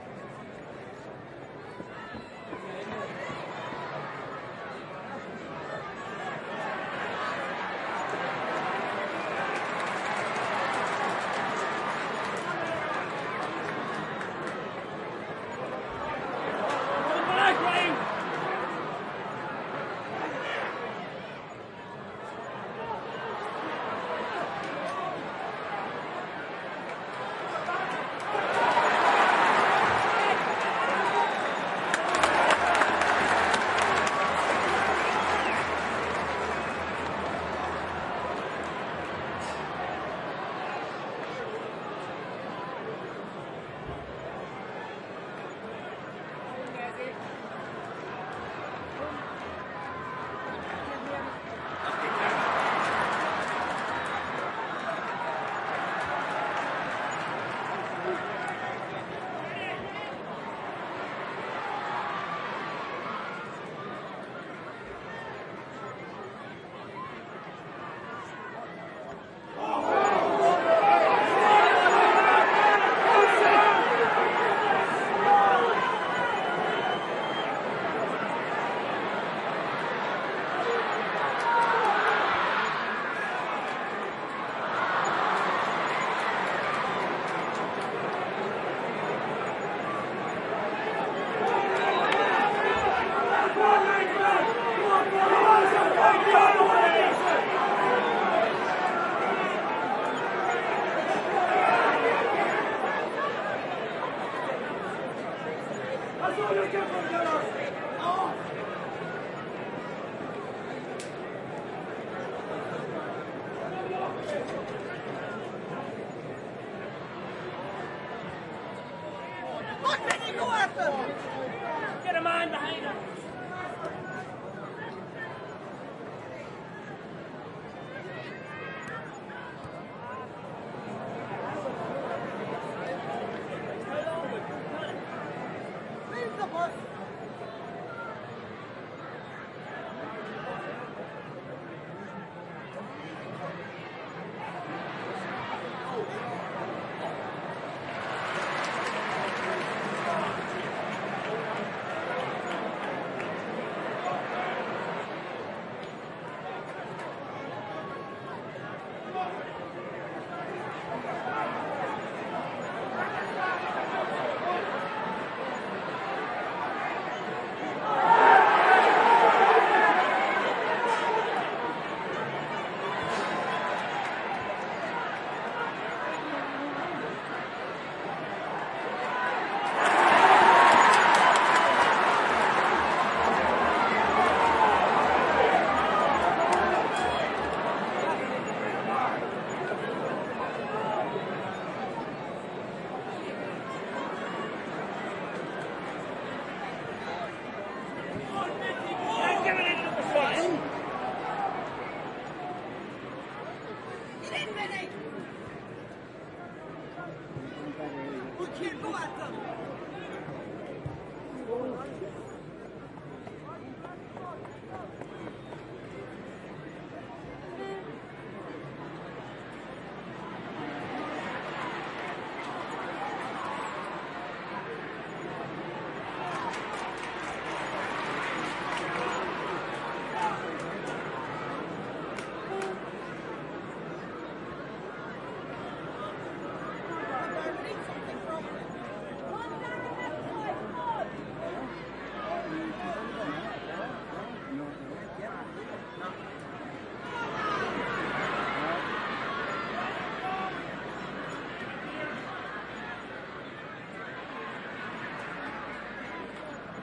Ireland gaelic football match 1
fans, gaelic, Ireland, match